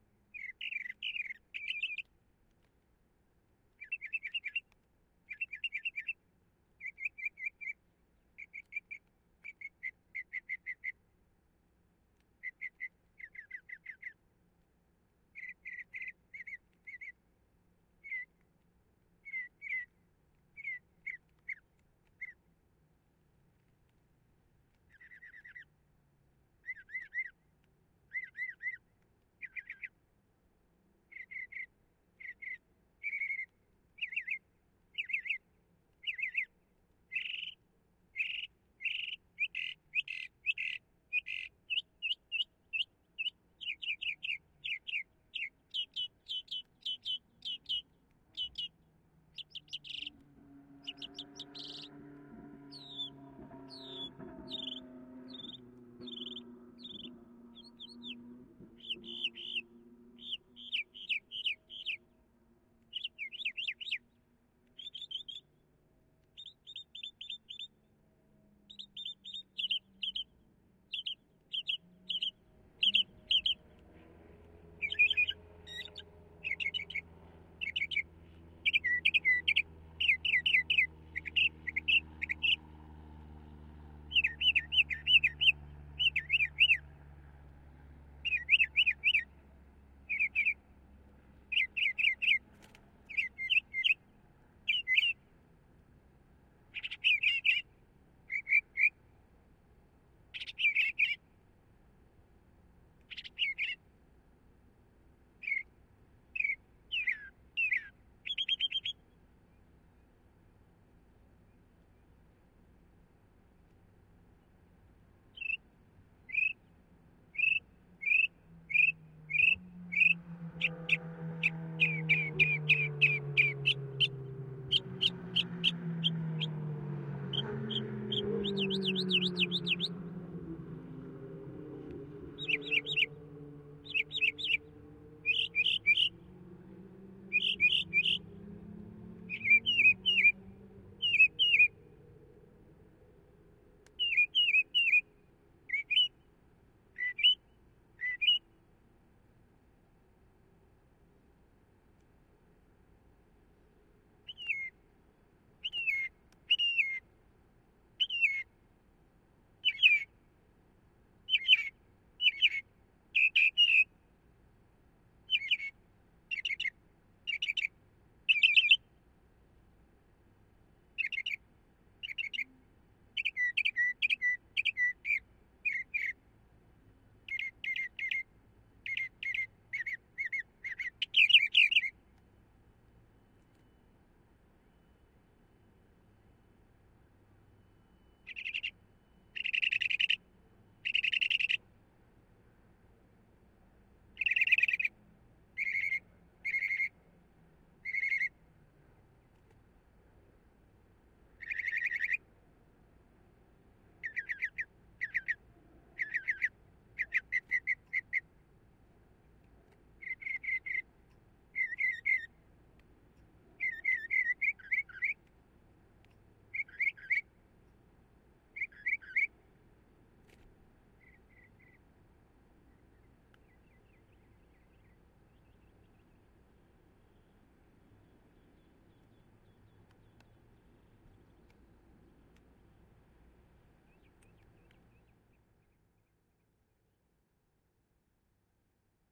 Northern Mockingbird's various different calls. This was taken 4-6-19 in Sebring, FL. Very good audio. This bird was perched on a video camera, singing directly into its microphone. I was in a truck with audio coming into the console, and immediately started tracking when I heard it.
field-recording, mockingbird, birdsong, bird